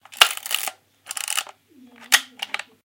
Yashica Electro GSN load and shutter
Load and shutter sound. Recorded with Rode VideoMic Rycote and Tascam DR-05.
35mm, analogue, camera, click, Electro, film, GSN, load, photography, rangefinger, shutter, vintage, Yashica